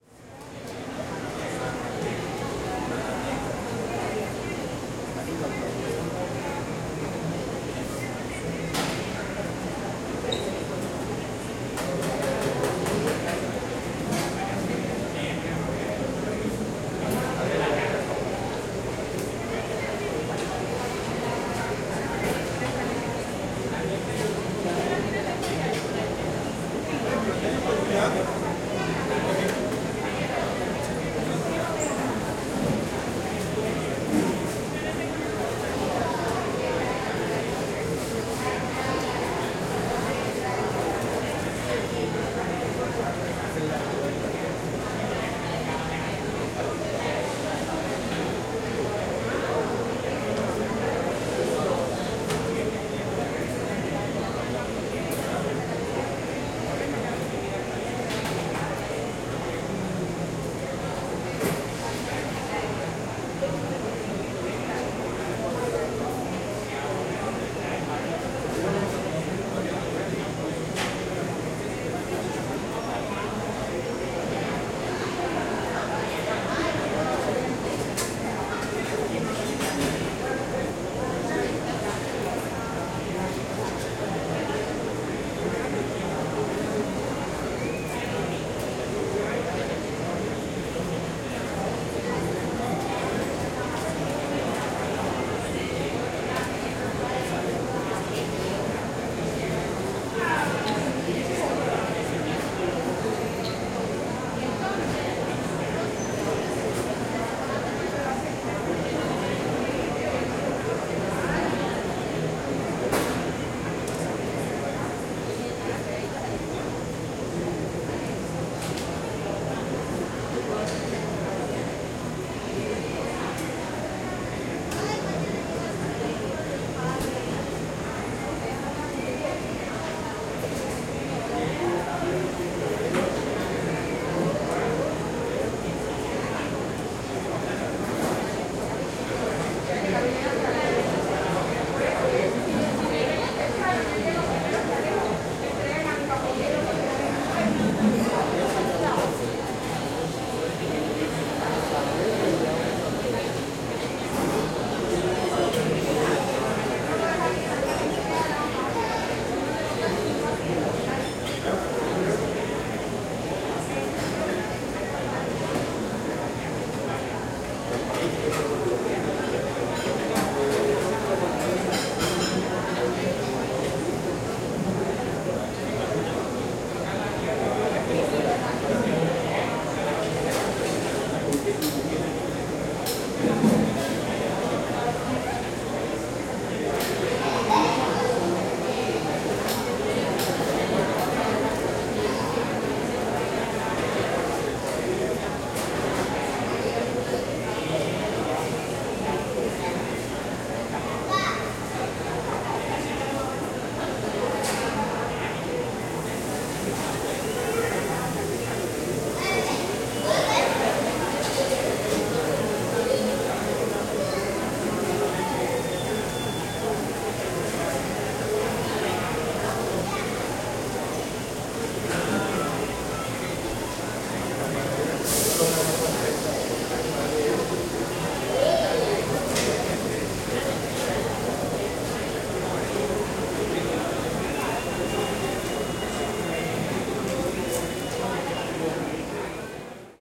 Colombian Airport Food Court Quad
Colombian Food Court in Airport Quad, Recorded with Zoom H3-VR
Ambience, Court, Crowds, Dishes, Food, Indoors, Restaurants, Walla